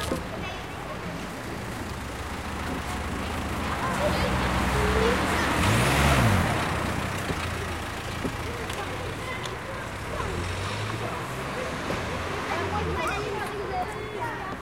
A car drives past on a Paris street.